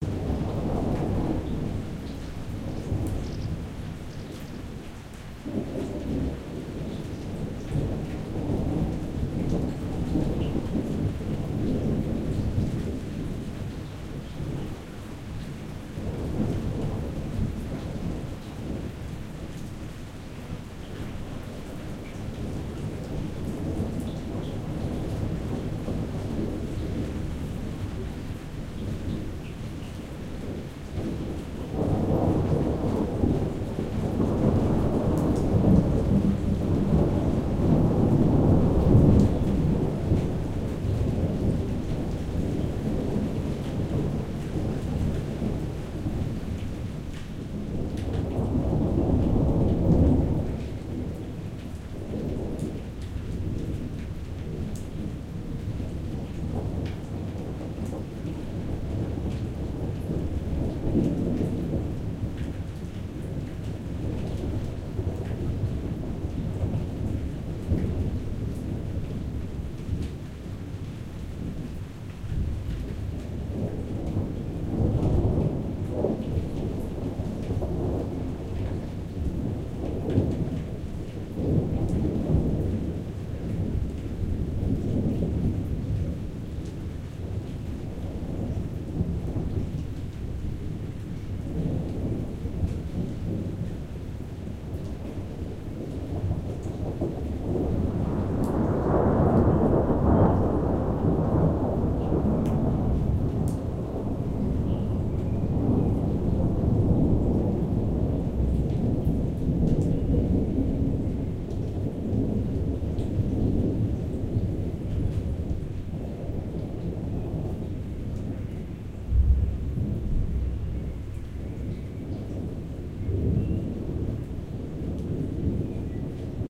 Distant Thunder Rumble Ambience
Distant thunderstorm recorded in my backyard.
Equipment that is used: Zoom H5 recorder + Audio-Technica BP4025 Microfoon.
distant, far, lightning, rain, raindrops, raining, rumble, thunder, thunderstorm, weather